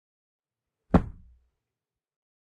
stomp sound effect
sound-effect, stomp, stomping